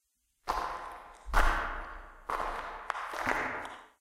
Walking into a dark and mysterious cave.